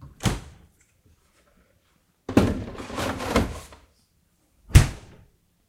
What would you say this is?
fridge oc 2
Opening fridge, taking out milk. Putting milk back, closing fridge.
door, fridge, kitchen, milk